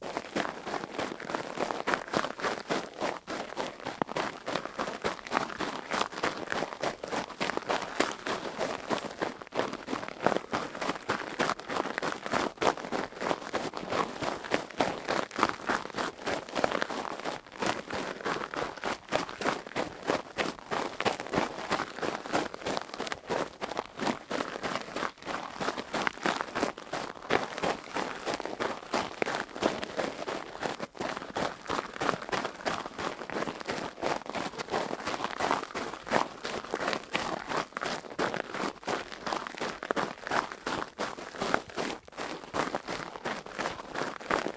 A stereo file of running on gravel.